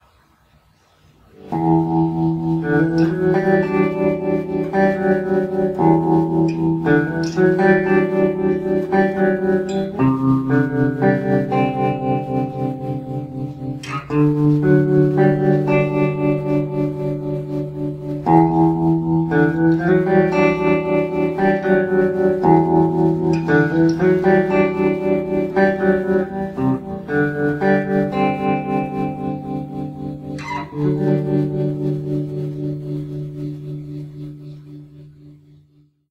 Slow tremolo guitar sound that I made to use in the intro of a video
electric, tremolo, tremolo-guitar